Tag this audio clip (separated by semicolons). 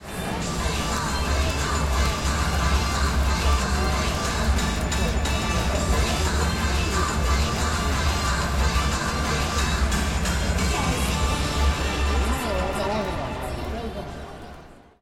Crowd; Baseball; Soundscape; Ambient